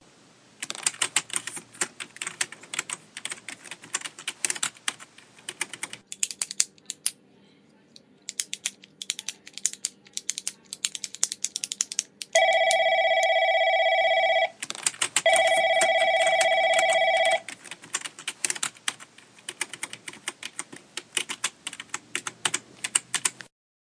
Week 4 Sound
Atmosphere of an office. Phone rings with pen clicking.